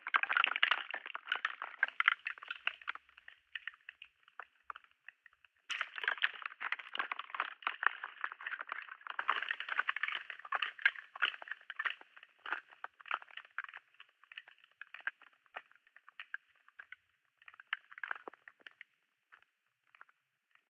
keyboard keys underwater
underwater recording of several computer keyboard keys hitting each-other.
OKM II Studio-> MD.